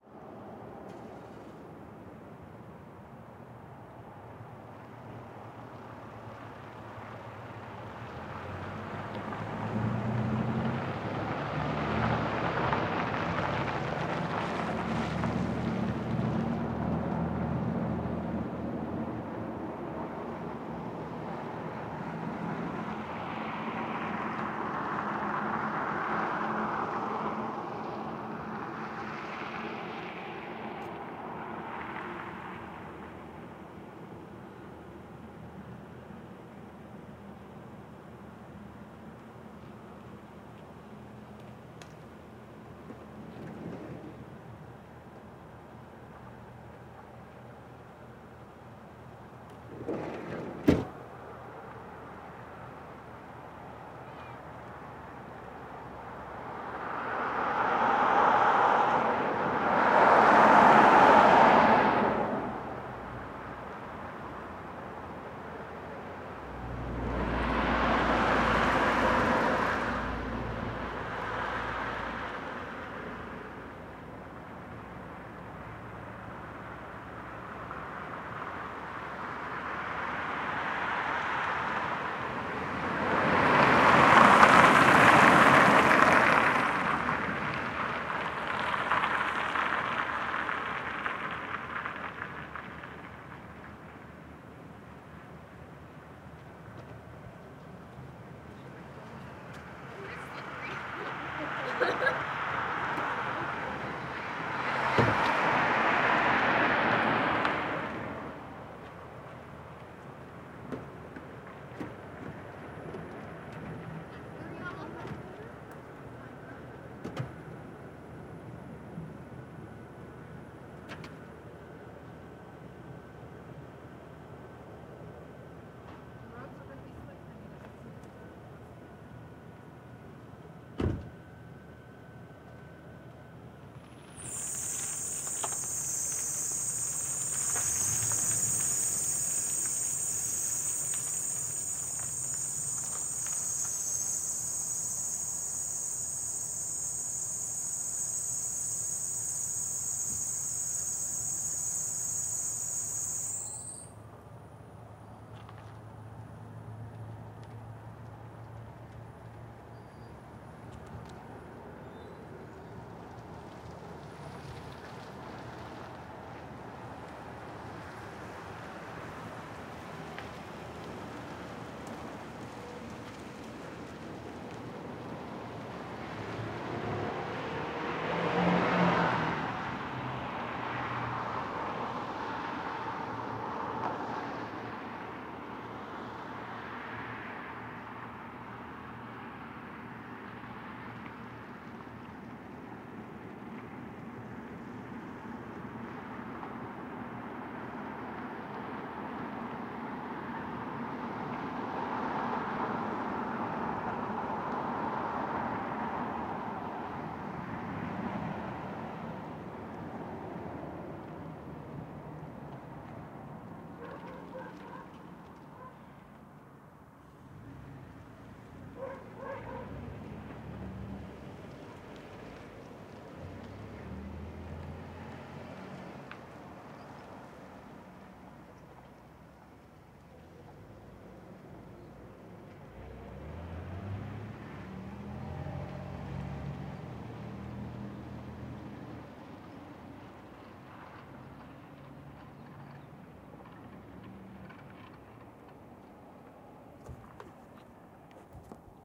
Small Town Night 001
This recording was made in a small country town at night. Several slow cars and pickups pass. Very quiet otherwise.
Recorded with: Sound Devices 702T, Sanken CS-1e